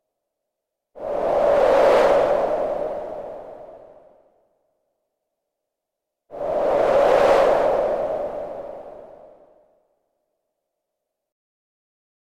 f Synth Wind Whoosh 5
Wind whooshes whoosh swoosh Gust
Gust, whooshes, swoosh, whoosh, Wind